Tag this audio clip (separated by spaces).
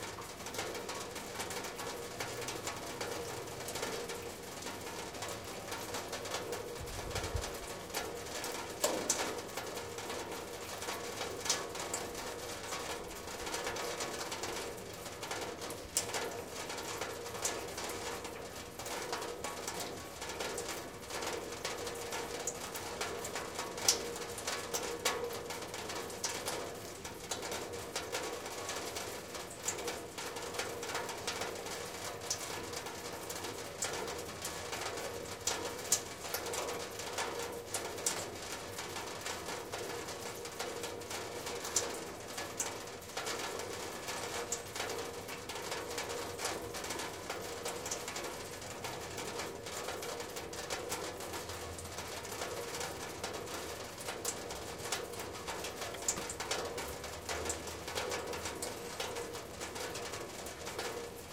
Field-recording rain Saint-Petersburg